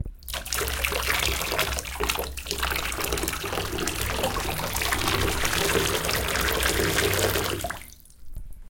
Water flowing over the stone number 2
river, water